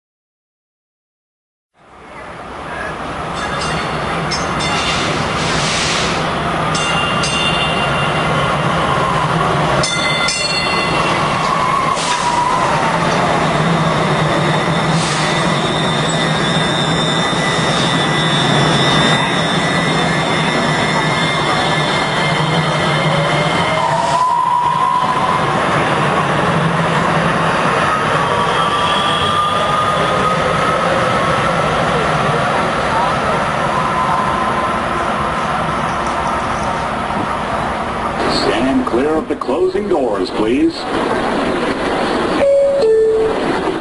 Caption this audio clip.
4-15-11 WestEndOppositeTracks (Dobberfuhl Bridgewater)
Part of the Dallas Toulon Soundscape Exchange Project
April 15, 2011 - West End Dart Station in Dallas - 9:31pm
Relatively orderly sound with rugged texture. High pitch in some instances, but generally lower frequencies. Train arriving and departing causes fluctuation in intensities. Mumbling of voices causes more chaos and more texture in the soundscape.
Temporal Density: 6
Polyphony: 4
Loudness: 6
Chaos/Order: 6
by Brad Dobberfuhl and Brandon Bridgewater